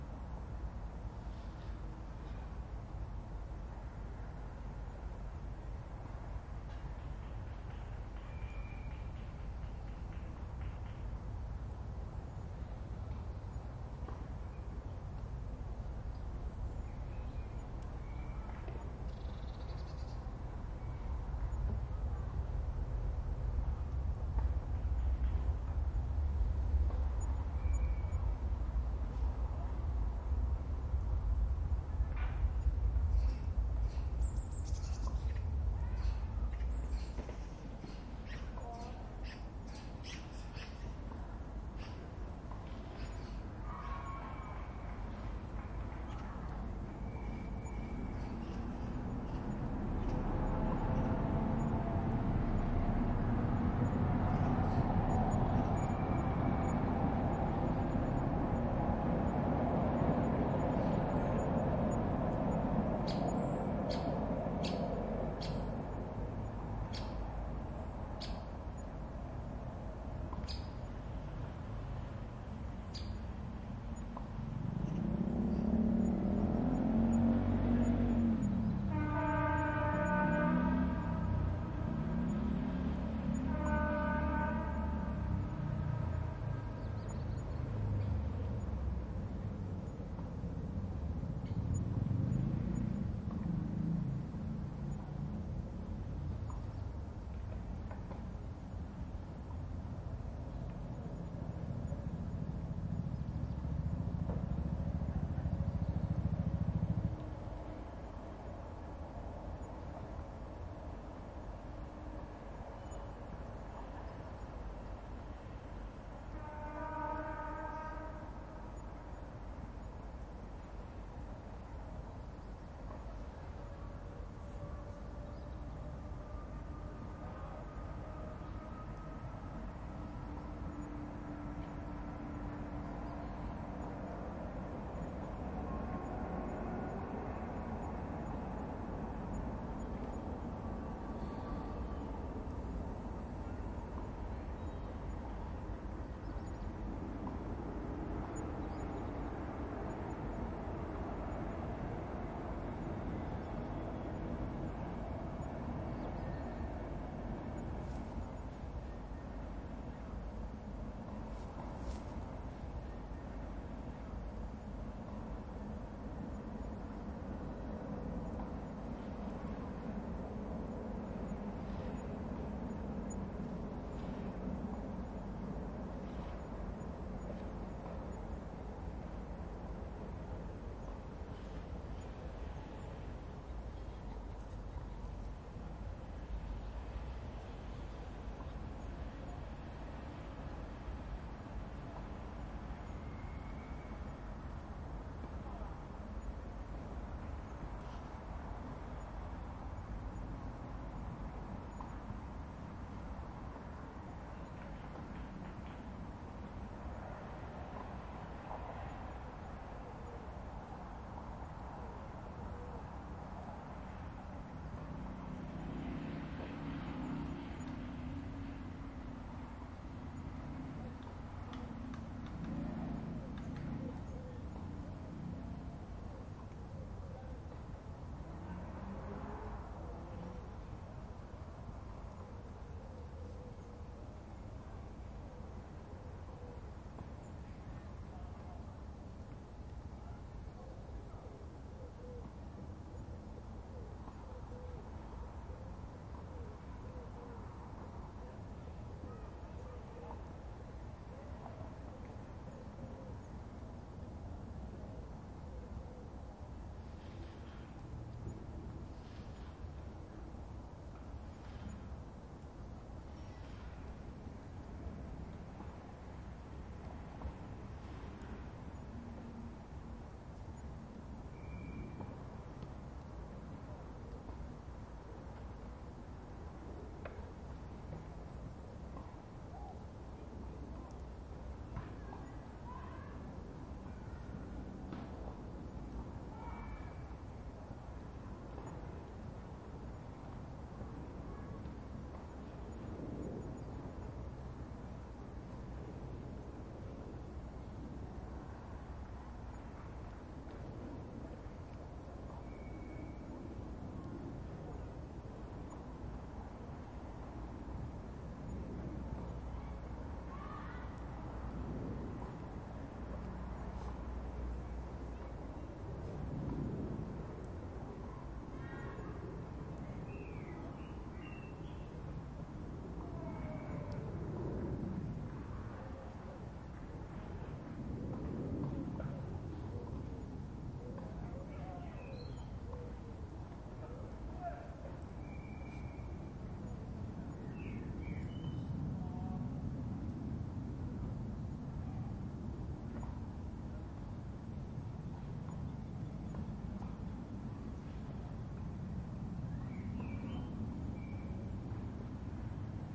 top of hill inside city, distant skyline sounds spring time
top of the archery hill in prague 4, recording the skyline of the city using the a-b stereo microphone technique
spring, hill, afternoon, skyline, city, outdoor, nature, town, prague, field-recording